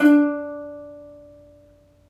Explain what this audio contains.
Notes from ukulele recorded in the shower far-miced from the other side of the bathroom with Sony-PCMD50. See my other sample packs for the close-mic version. The intention is to mix and match the two as you see fit. Note that these were separate recordings and will not entirely match.
These files are left raw and real. Watch out for a resonance around 300-330hz.
uke, ukulele, room-mic, note